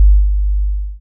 50hzSine env
part of drumkit, based on sine & noise
drums, sine, noise